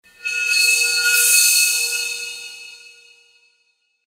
5 Gallon Glass Water Bottle, swirling a small copper coil in the bottom of the bottle.